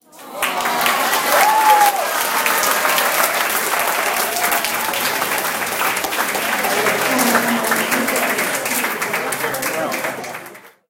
awww than applause and cheering
A crowd awwing and applauding after a kid gives a speech at a graduation party. Recorded with an iPhone with Voice Memos.
cheers, cheer, applause, applauding, aww, aw, awww, crowd